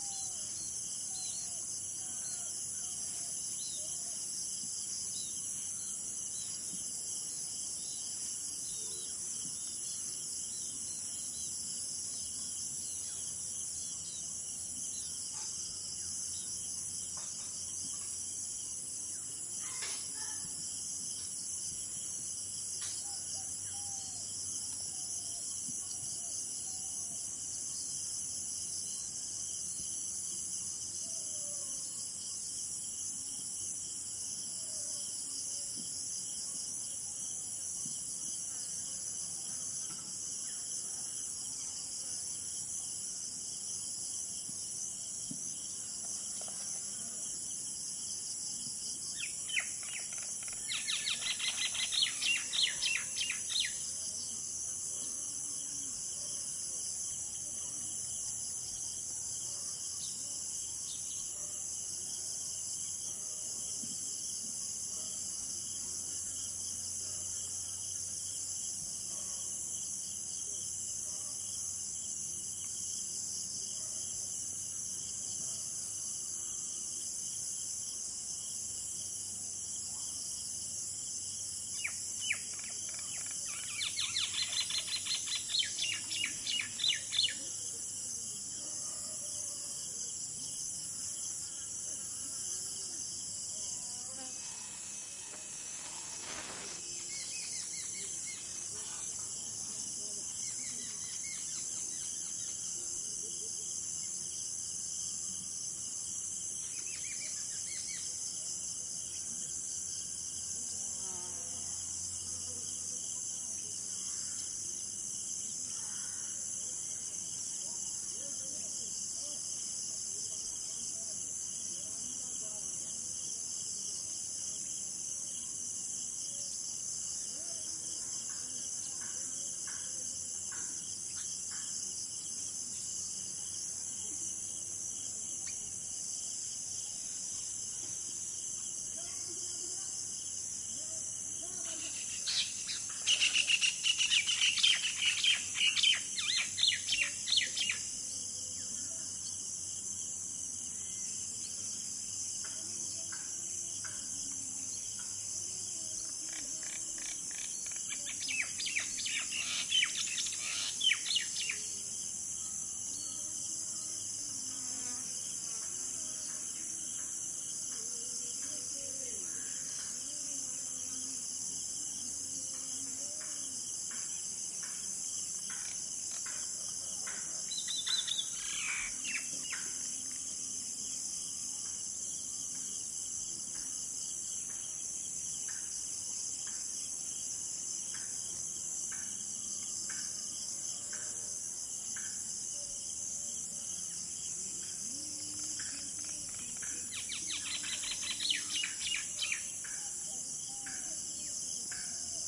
country rural backyard residential early morning crickets birds and distant traffic and voices India
crickets, early, rural